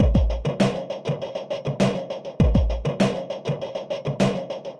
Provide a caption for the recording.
SIMPLE DRUM WITH FILTER
drum-loop, processed